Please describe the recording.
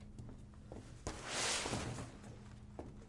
dragging vinyl
vinyl, rubbing